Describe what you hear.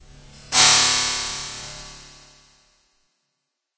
Artificial Simulated Space Sound 02
Artificial Simulated Space Sound
Created with Audacity by processing natural ambient sound recordings
alien, ambient, artificial, atmosphere, drone, effect, experimental, fx, pad, sci-fi, scifi, soundscape, space, spacecraft, spaceship, ufo